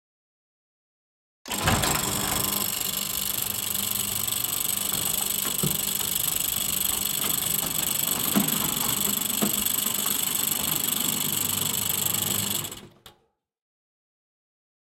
Electronic Gate Open 02
motor, gate, engine, machine, start